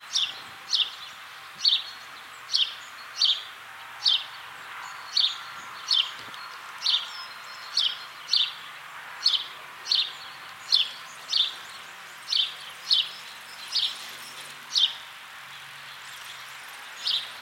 Sound of whistling birds (or just one, don't know). Recorder with a Behringer ECM8000.